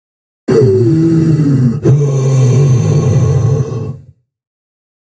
Breathing Industrial Game: Different breathing with some distortion. Sampled into Ableton using distortions like Trash2, compression using PSP Compressor2. Recorded using a SM58 mic into UA-25EX. Crazy sounds is what I do.
male, breath, random, dark, unique, techno, hardcore, horror, industrial, sci-fi, synthesizer, distortion, vocal, sigh, breathing, effect, electro, porn-core, gasping, processed, resonance, game, noise, gritty, sound, rave, electronic, dance